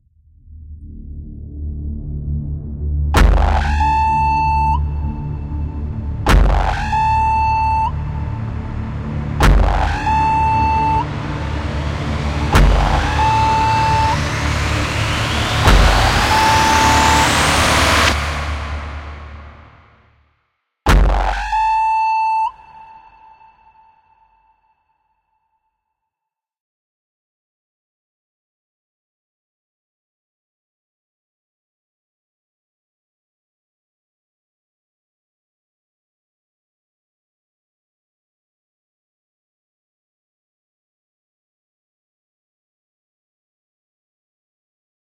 A short intro piece for any science fiction audio piece, Please share any work you do, I would love to hear it